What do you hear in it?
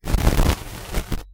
glitch and static type sounds from either moving the microphone roughly or some program ticking off my audacity
static, digital, glitch, electronic, noise